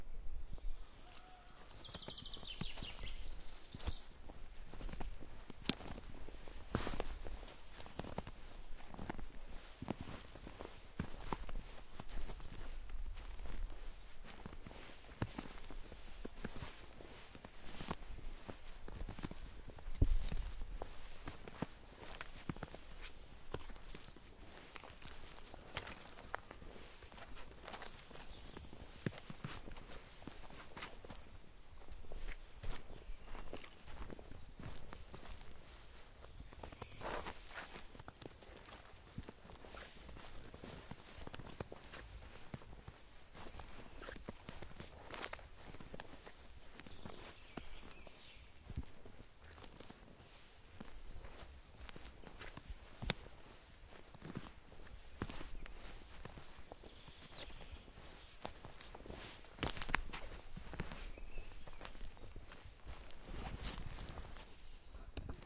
walking in snow in the woods
Walking in shallow snow in the woods in northern Bohemia (Czech republic). Recorded with Zoom H4N and normalized.
ambience, birds, field-recording, forest, snow, walking, wind, woods